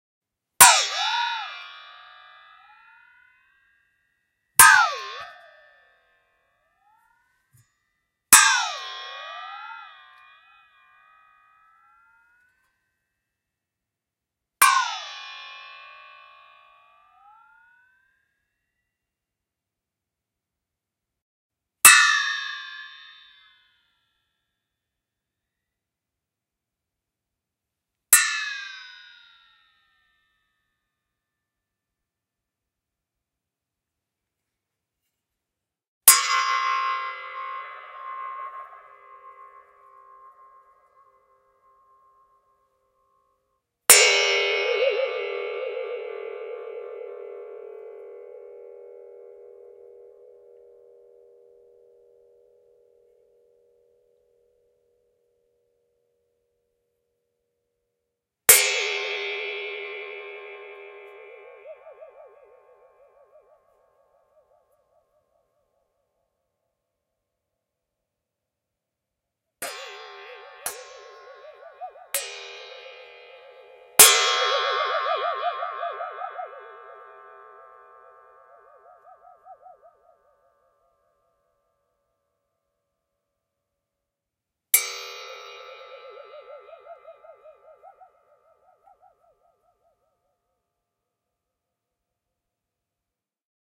Two Man Saw - Single Hits 4
1.5 meter long crosscut two-man saw with wooden handles being hit at different strength levels, various hit tail alterations and manipulations as the body of the saw is being bent or shaken. Occasional disturbance in the left channel due to unexpected recording equipment issues.
saw, blade, metal, two-man-saw